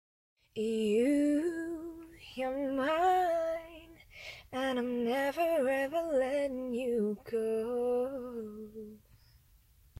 'You're mine'
A female voice singing a line that could be used in a song. :) (Sorry for lack of detail, I'm pretty busy nowadays)
vocal, female, lyrics, singing, song, voice, girl